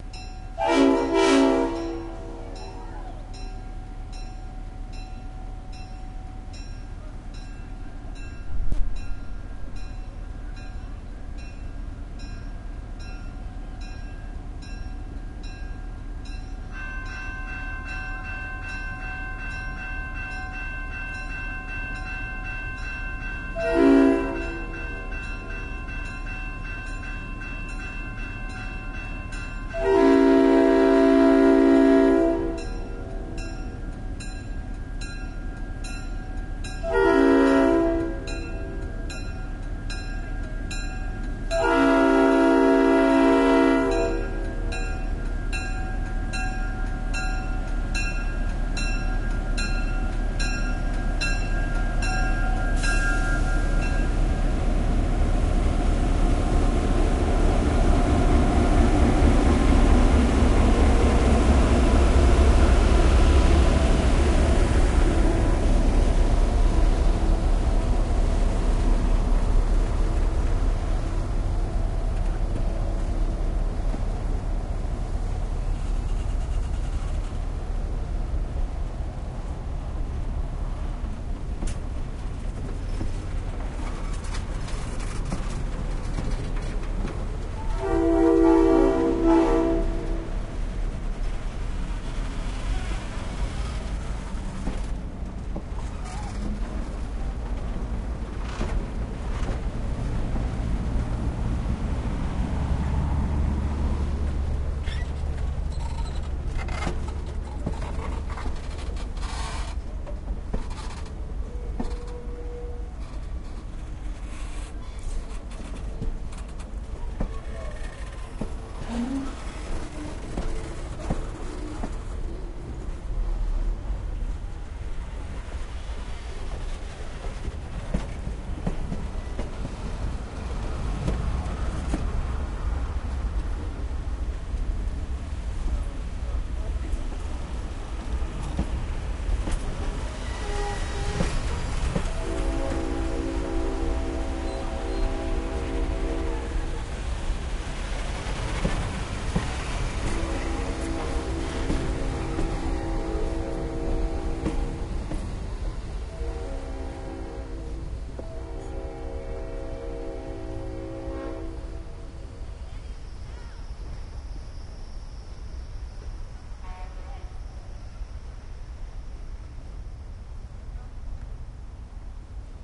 Deisel locomotive and crossing bell
Crossing bell and diesel locomotive. Locomotive goes from stop to a slow start with crossing bell. Recorded in Dillsboro, NC. Part of the Smoky Mountain Railway.